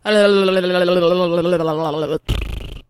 tongue sounds
just making sounds with my tongue, I think during a narration where I kept stumbling over words.
raspberry, tongue